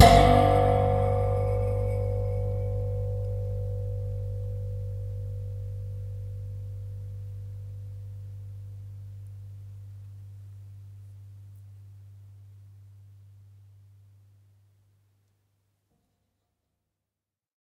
This sample pack contains eleven samples of the springs on an anglepoise desk lamp. I discovered quite by accident that the springs produced a most intriguing tone so off to the studio I went to see if they could be put to good use. The source was captured with two Josephson C42s, one aimed into the bell-shaped metal lampshade and the other one about 2cm from the spring, where I was plucking it with my fingernail. Preamp was NPNG directly into Pro Tools with final edits performed in Cool Edit Pro. There is some noise because of the extremely high gain required to accurately capture this source. What was even stranger was that I discovered my lamp is tuned almost perfectly to G! :-) Recorded at Pulsworks Audio Arts by Reid Andreae.